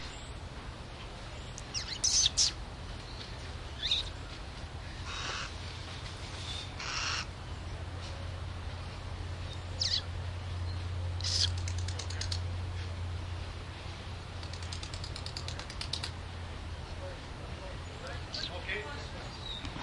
Talking to owls at the Busch Wildlife Sanctuary recorded with Olympus DS-40.